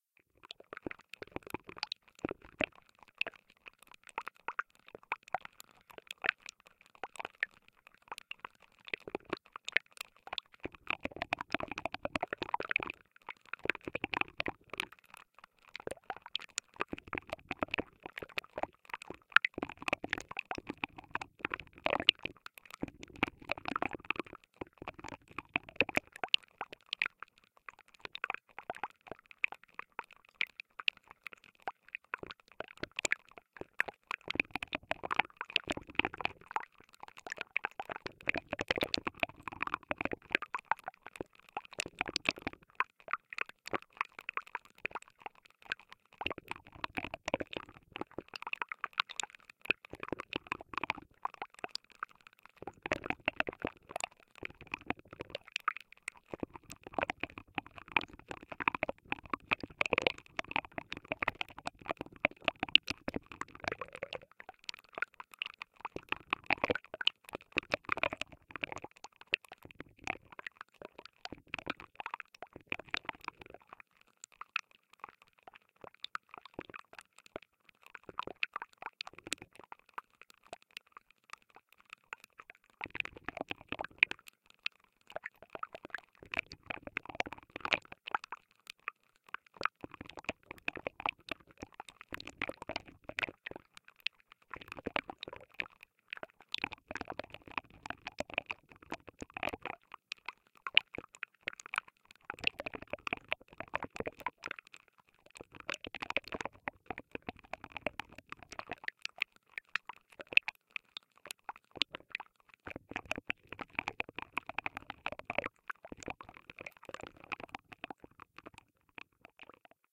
A mono recording from within a drainpipe, using a Jrf Hydrophone. Recorded at Newhaven Fort, England.
Hydrophone, Water, Field-Recording, microsound